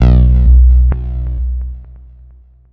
revers Bass
revers saw bass with delay
trance, delay, bass, synth, techno